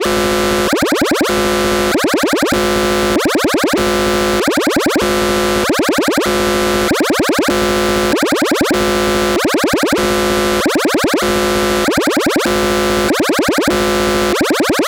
Cartoon-like siren recreated on a Roland System100 vintage modular synth